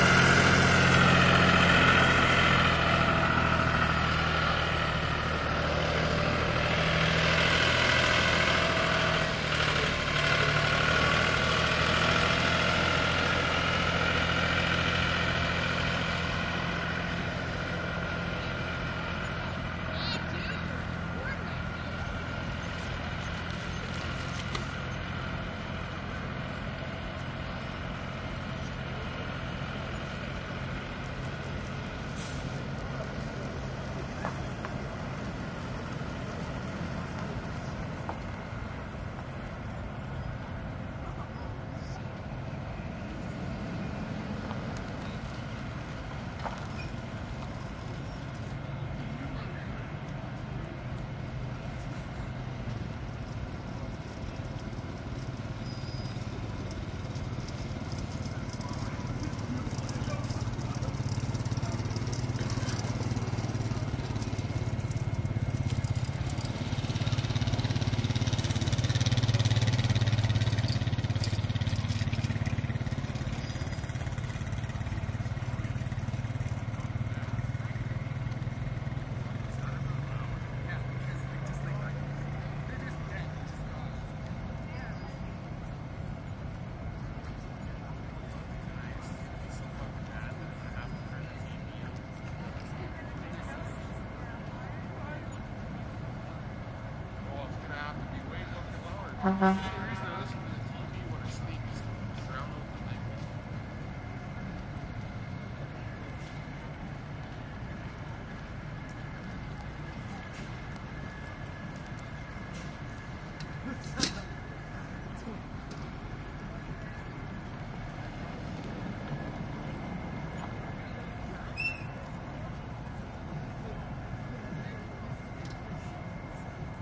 water tanker truck and ATV pass slowly by dirt road music festival +distant horn blast echo and light crowd Shambhala
ATV, blast, by, dirt, distant, echo, horn, pass, road, slowly, tanker, truck, water